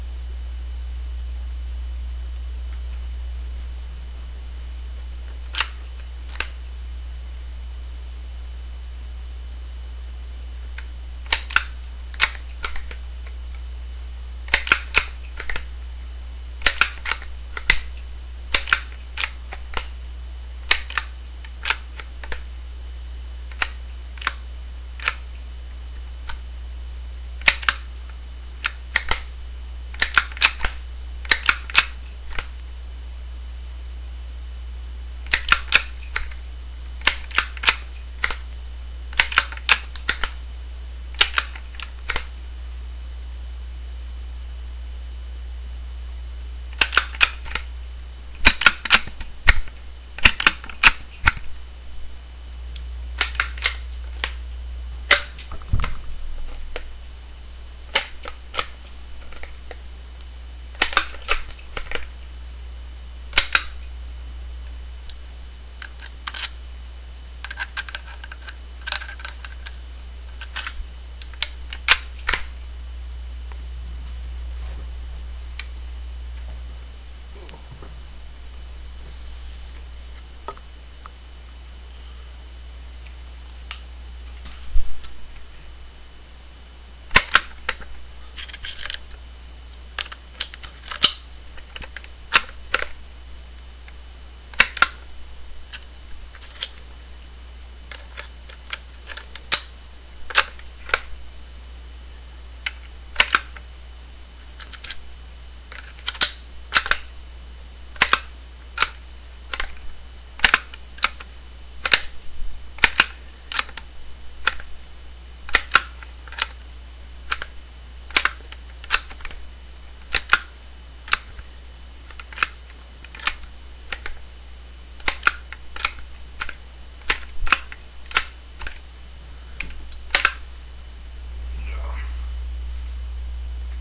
Bolt Action rifle handling
An old Eastern European .22 training rifle being handled. I used a small desktop mic (not sure of the brand name, but it was pretty cheap), recording directly into my computer. Recorded in a small room. Cocking, dry-firing, magazine removal/insertion, etc. included on the recording.